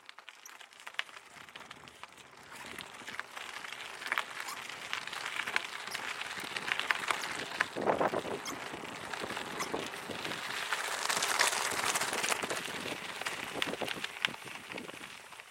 Bike On Gravel OS
Mountain-Bike Pedalling Gravel